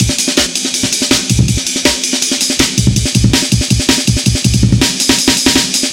A breakbeat with semi kicks replacing the main kicks 162bpm. programed using Reason 3.0 and Cut using Recycle 2.1.